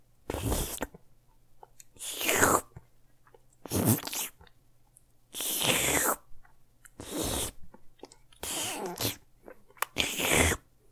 For drinking or licking something. Doesn't found what I needed on site, decide to record myself. Recorded on behringer b-2 pro, cut noise with audacity.
Звук для питья или облизования чего-либо. Не нашёл нужного на сайте, решил записать сам. Записывал на behringer b-2 pro, вырезал шум через audacity.
Парочка русских тегов: Хлюпанье Причмокивание Питьё Пить Облизывание